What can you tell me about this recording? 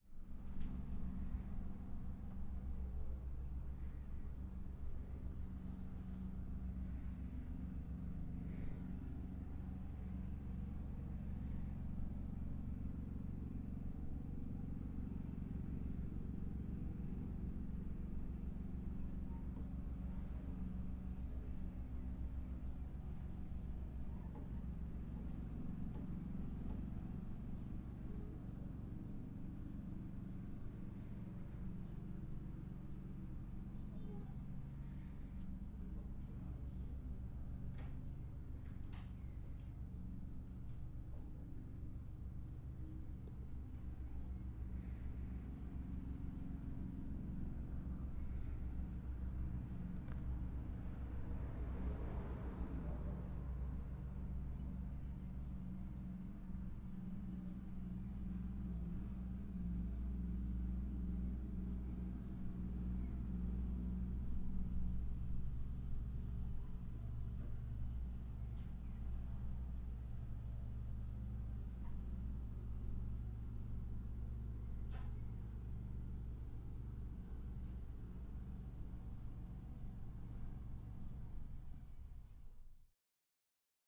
atmosphere - interior village (mower)
Atmosphere / room tone, recorded in a workroom.
atmosphere, village, mower, ambience